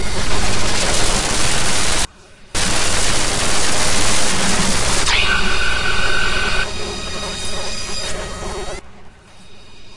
busy 2-bar loop with sound design done in Native Instruments Reaktor and Adobe Audition
noisy, 2-bar, noise, industrial, electronic, sustained, sound-design, loop, panning